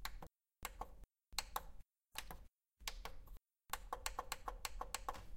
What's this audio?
Pushing hard buttons
Pushing hard plastic button. Recorded on Zoom H6. Pushing old plastic buttons on an old computer screen.
plastic, short